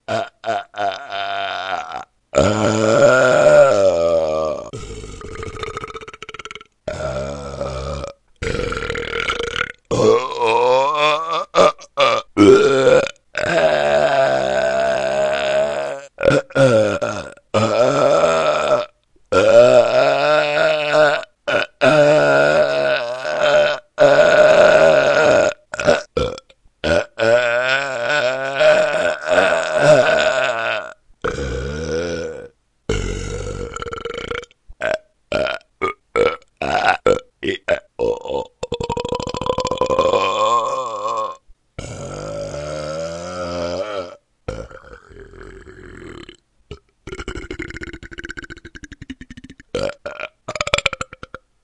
Adult male burbs